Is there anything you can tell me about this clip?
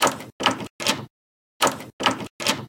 LoopSet 02.01-SimpleDoor
Something went wrong with this sound - instead of exporting 2 bars, I ended up with 1 and 3/4 bars, so it does not loop properly.
The next sound in this pack is the corrected version.
I was showing the spectrogram of different sounds to my daughter Joana, who has just turned 6.
At some point I decided to let her choose what sounds to look at. She initially picked 'cat', 'dog' and 'dragon'. And then typed in a sentence 'locking a door', and eventually we got to this sound:
Joana realized it had rhythmic properties and said "sounds like a rhythm". I played it in loop mode and she said "too fast!". We agreed that the gaps between the sounds were just not right and needed rearranjing. - At this point I was already thinking of the Continuum-4 mini-dare :-)
I will add more sounds to this pack soon, with modified versions or additional sounds layered on top.
door-handle, rhythmic, loopable, seamless-loop, door, 2bars, Joana, 150bpm, simple, mistake, wrong, Continuum-4, rhythm, loop